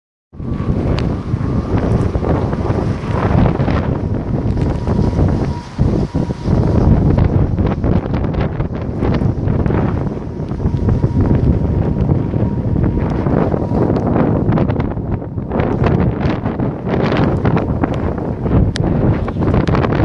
Night Snore. O Ressonar da Noite
The sound of the wind slashing through the tight buildings
resonance-between-buildings, Sound-Walk, Storm, Wind, Windy